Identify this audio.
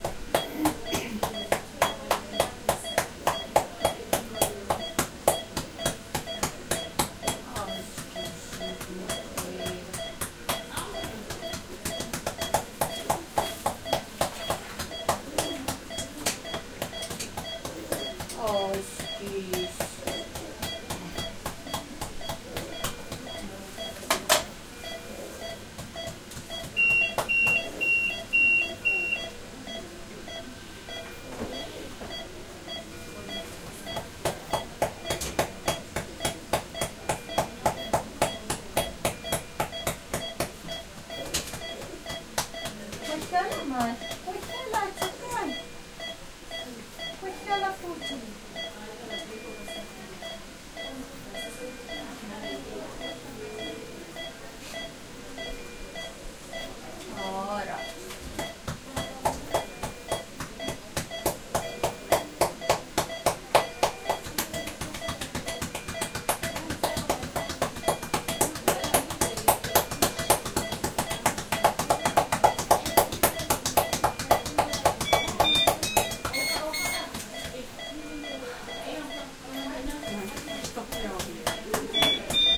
180081 Hospital Physiotherapy 01
A patient being treated during a Physiotherapy session
OWI, Monitors, Hospital